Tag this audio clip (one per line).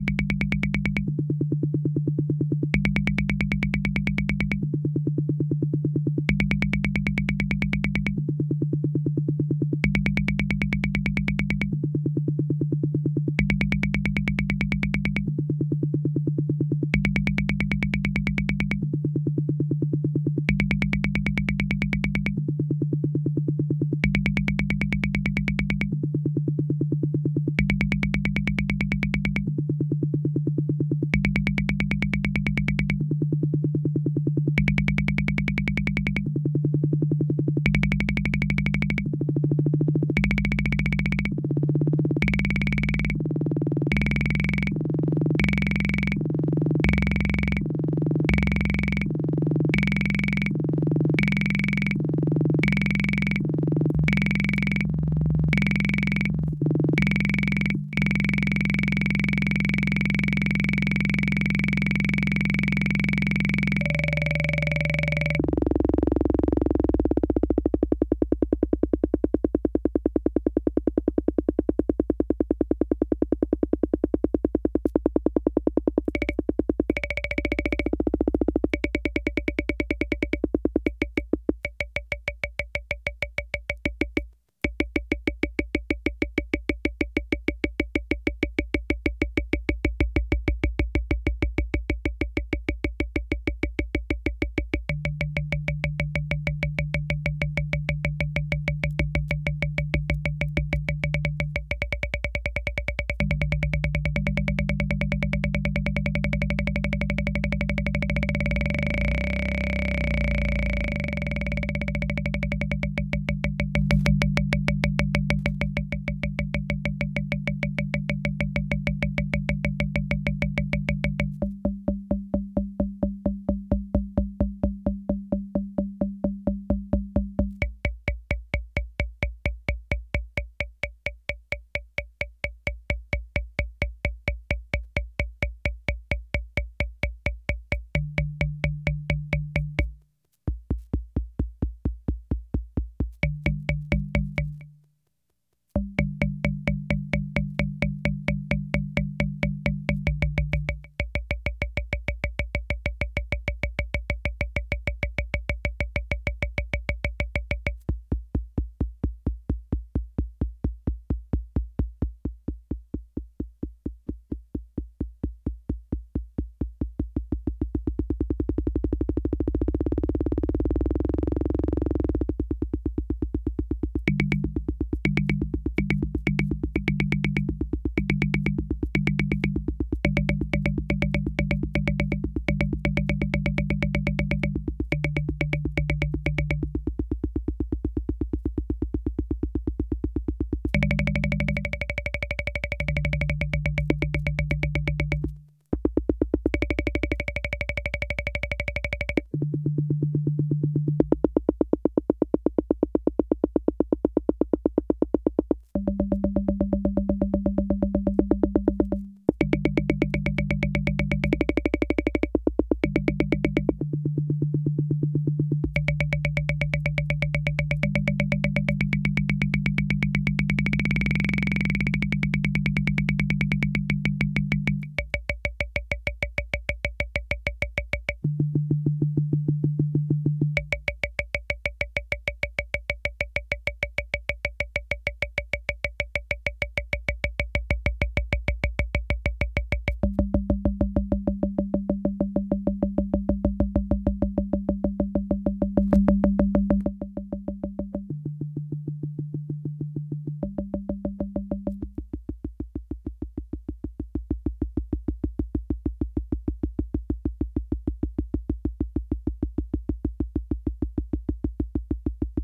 univox
drum-machine
korg
analog
mini-pops
bd
toms
bonk
vintage
drums
bassdrum